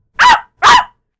Angry Small Dog Bark2
A recording of my talented dog-impersonating sister on my Walkman Mp3 Player/Recorder. Simulated stereo, digtally enhanced.
bark, dog, puppy, small-dog